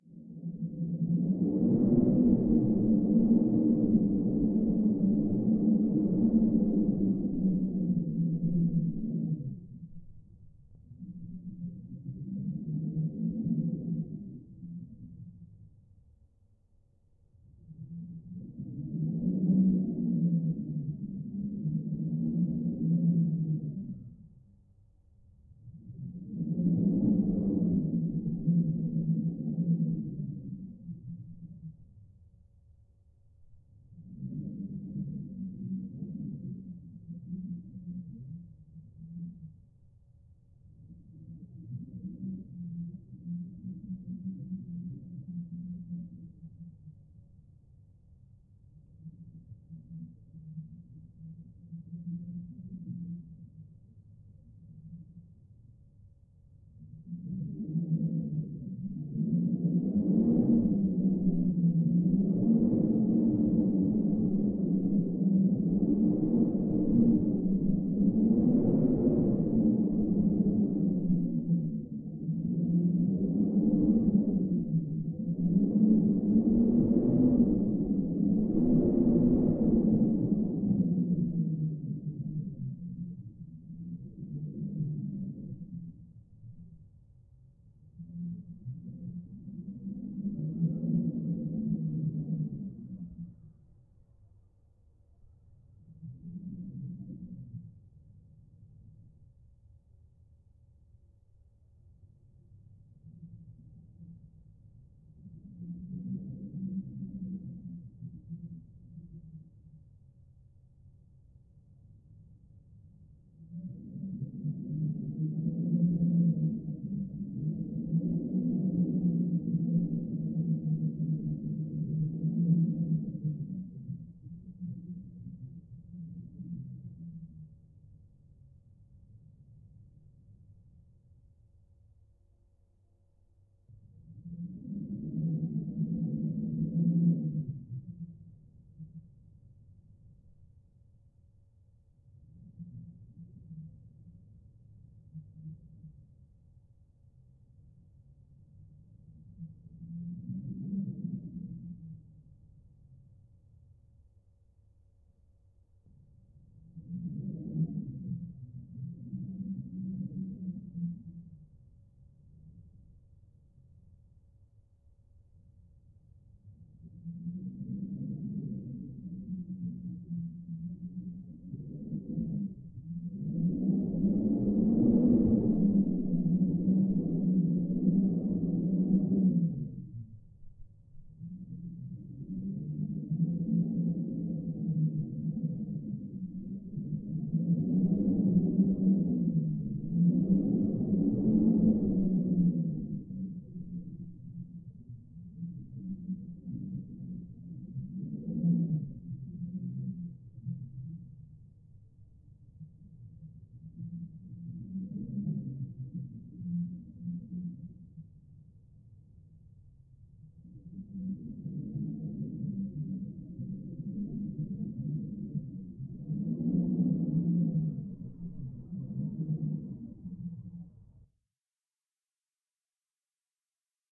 ambient - airflow howling
A mystic airflow recorded near a door in my house. At this time a strong breeze got through the opened window.
airflow, atmo, door, horror, howl, mystic, wind